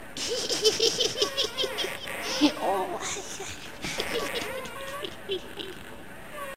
Laughing Witch (or Old Woman) in a Rocking Chair
A short audio file of an old woman / witch laughing maniacally as she rocks in a rocking chair. Possibly useful for horror soundtracks.
witch, old-woman, horror, scary, old, evil, woman, laugh, creepy, laughing